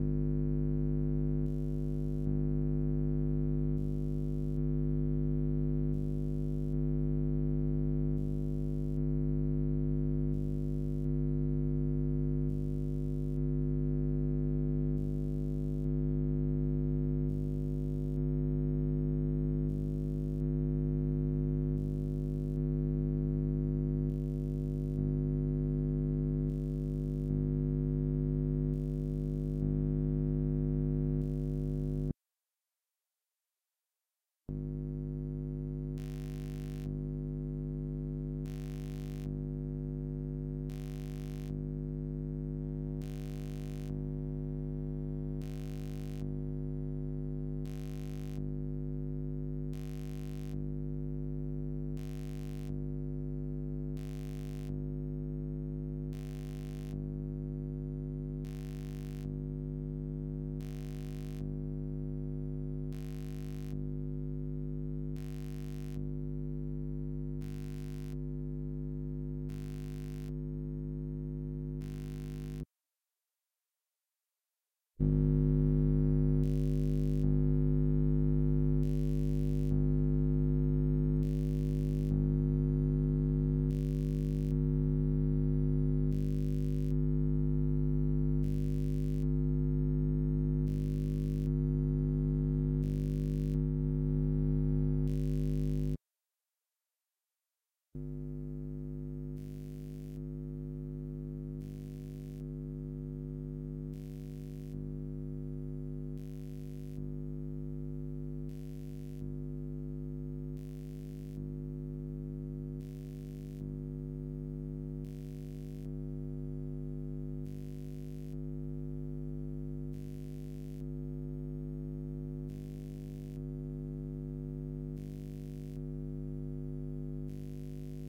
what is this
soldering station noise
various noises from a Hakko soldering station, as captured by a stereo coil pickup. every-time that the station is heating the tip, you can hear a change in the tone/hum, which creates a nice pulsating rhythm.
"circuit sniffer"-> PCM M10.
coil, soldering-station, station, interference, sniffer, coil-pickup, rhythmical, magnetic-field, electrical, rhythm, pulsating, soldering, electric